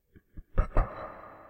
Short Evil Laugh 1
Sound of a man quickly laughing, useful for horror ambiance
terror, evil, horror, laugh, haunted, scary, fear, phantom, suspense, fearful, drama, spooky, sinister, creepy, ambiance